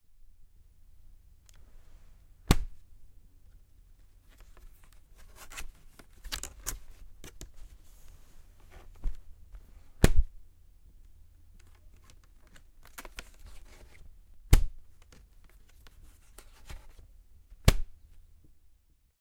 1 (8) closing the laptop
closing the laptop
closing, computer, laptop